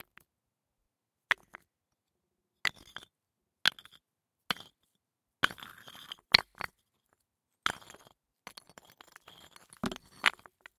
stones falling MORPHAGENE
Small stones being dropped on each other.
ambient, morphagene